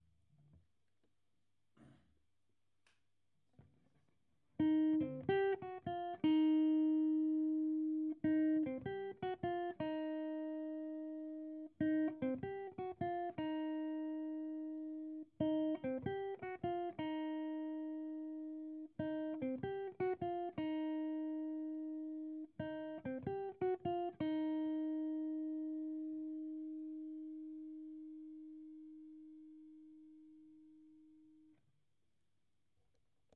Repetative Blues: Melody
Repetitive guitar melody for blues at 84bpm.
0, 12, 13, 1x12, 84bpm, bar, Blues, clean, electric, flat, gauge, Gibson, guitar, humbucker, Marshall, melody, SG, strings, triple, wound